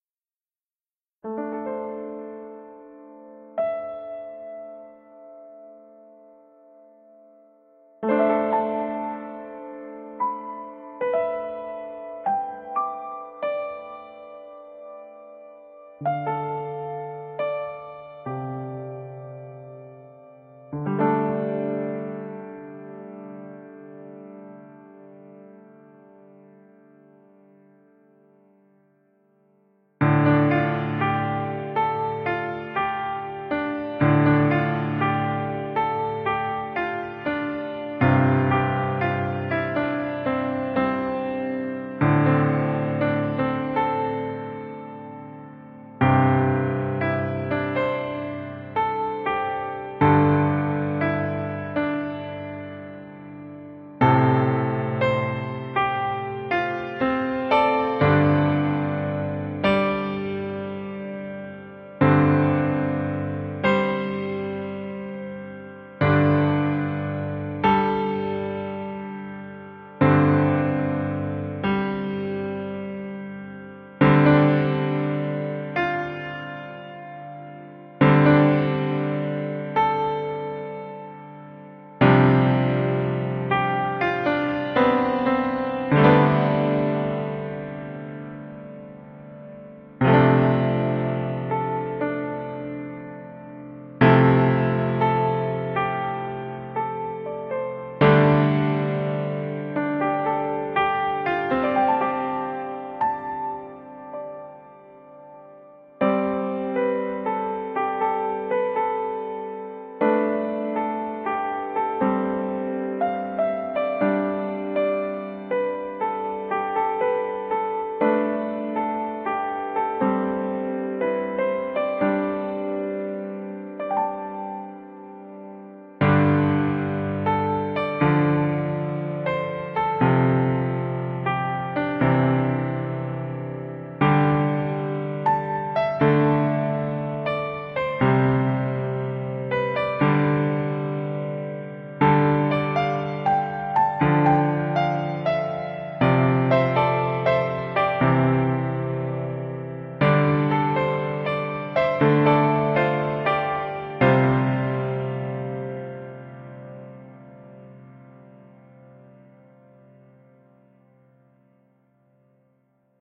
pianomotive (piano only)
A calm piano musical motive that can be used as a soundtrack. This version contains piano only.